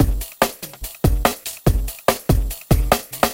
Hardbass
Hardstyle
Loops
140 BPM